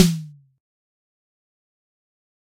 a lovely synthetic snare made with a drumsynth.